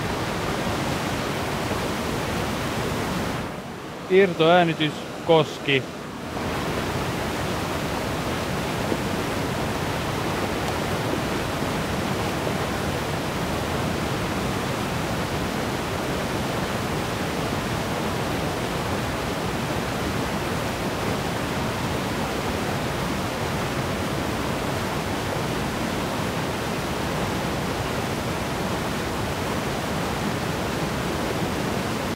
THE RATT02 1
Recorded next to an old water powerplant in Helsinki with a MKH60 to a SoundDevices 744T HD recorder.
field-recording, heavy-stream, waterfall